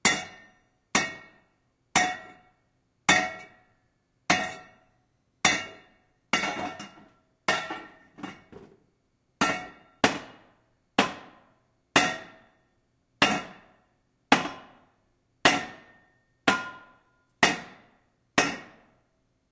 large pipe bang
banging on a large pipe in a scene shop